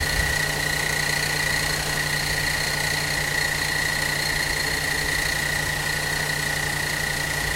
worn engine idle
Noise from a worn out Range Rover V8 engine. recorded with Zoom H1
Engine, engine-rattle, V8, worn-engine, Zoom-H1